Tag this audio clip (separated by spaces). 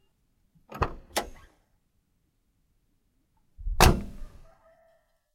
auto automobile car drive driving engine motor road start vehicle